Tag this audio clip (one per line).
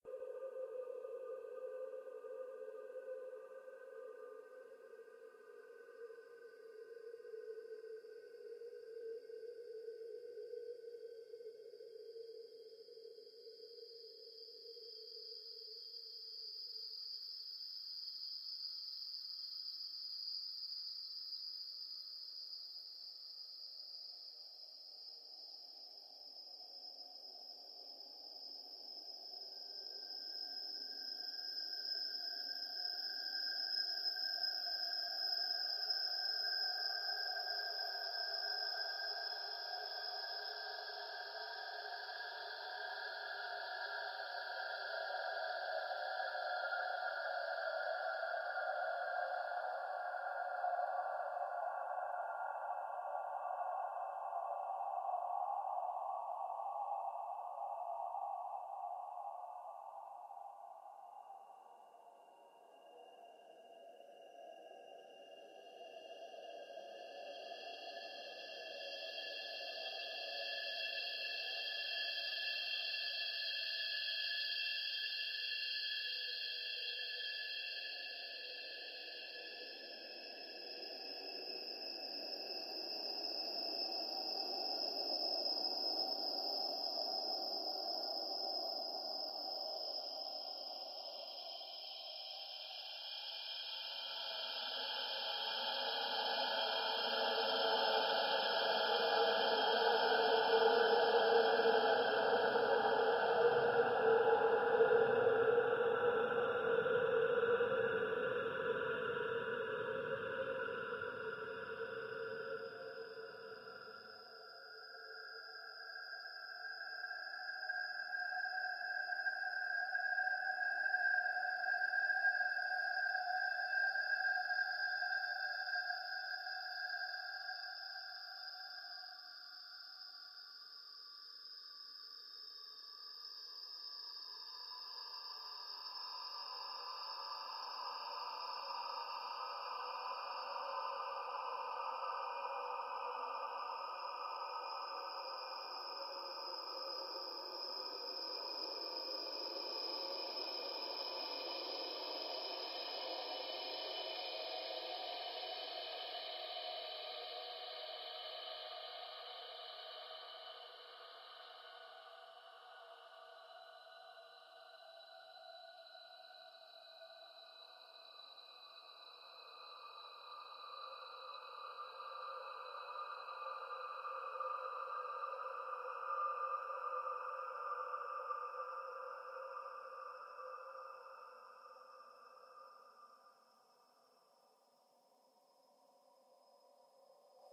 creepy
drama
fear
ghastly
ghost
ghostly
haunted
horror
nightmare
paranormal
phantom
spectre
spirit
spooky